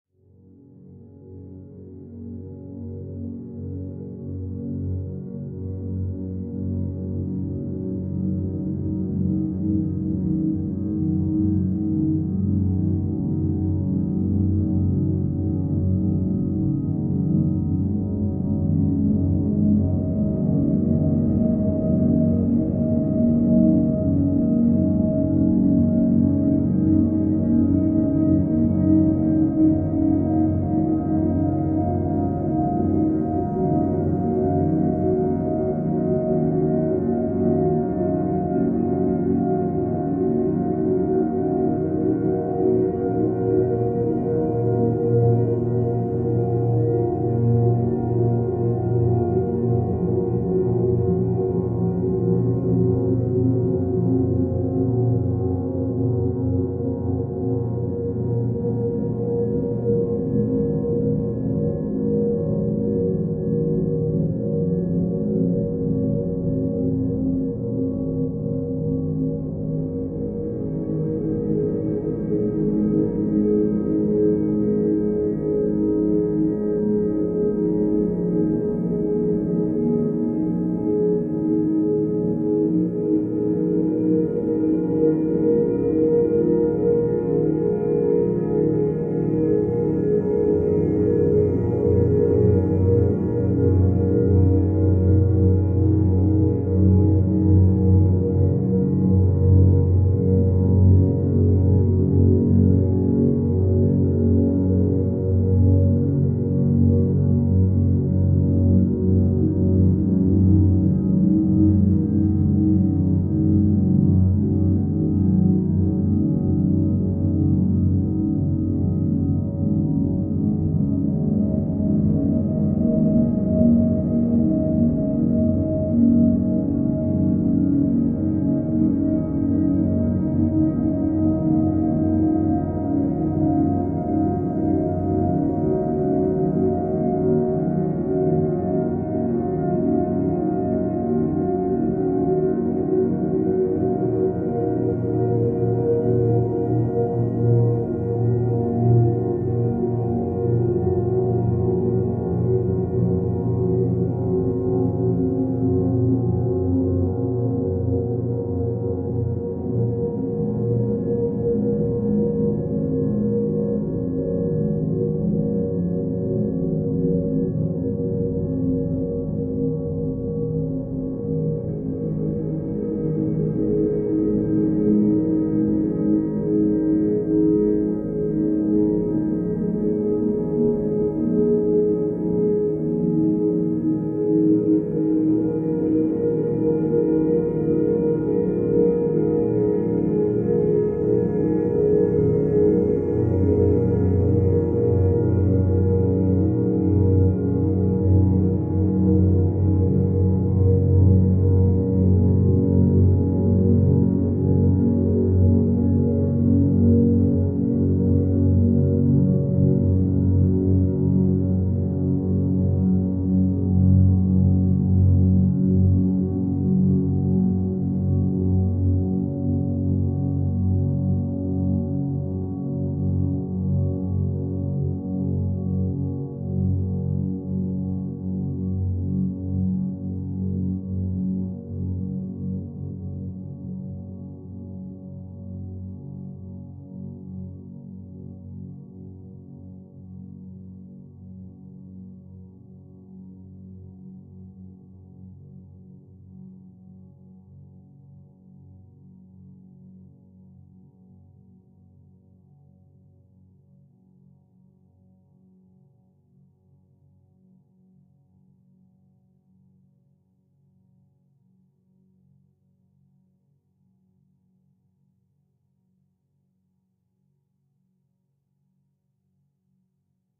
Ambient Wave 40
Drums, Atmosphere, Cinematic, Ambient, Ambiance, Looping, Drone, Loop, Sound-Design, Piano